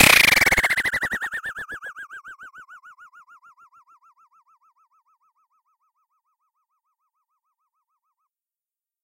Simple FX sounds created with an oscillator modulated by an envelope and an LFO that can go up to audio rates.
LFO starts almost at audio rates. The sound was low-pass filtered to remove some of the aliasing harsh artifacts.
Created in Reason in March 2014
aliasing, audio-rate, digital, FM, frequency-modulation, FX, LFO, low-pass, oscillator, Reason